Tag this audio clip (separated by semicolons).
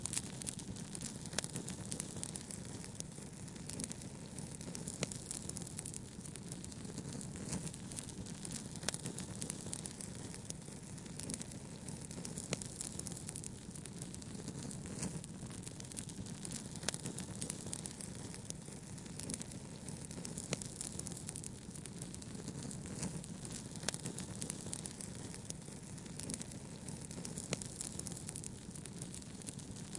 crackling; campfire; wood; crackle; ambience; loop; fire